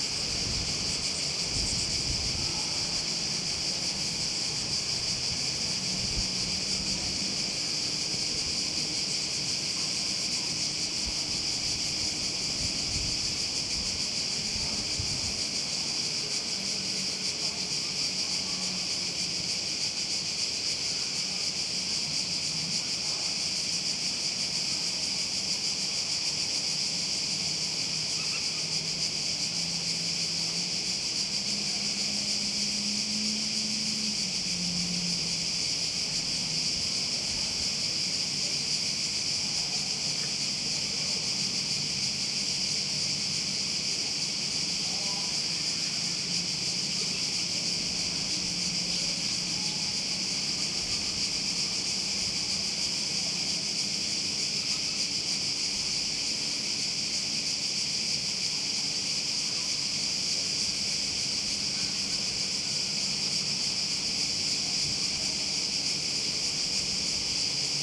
120803 Brela AT Hill 1 F 4824
A hillside above the Croatian coastal town of Brela, in the afternoon, featuring crickets, some quiet background traffic, and nothing much more.
These recordings were done during my recent vacation in Brela, Croatia, with a Zoom H2 set at 90° diffusion.
They are also available as surround recordings (4ch) with the rear channels set to 120° diffusion. Just send me a message if you want them, they're just as free as the stereo ones.
atmo, crickets, Croatia, field-recording, Hrvatska, mediterranian, nature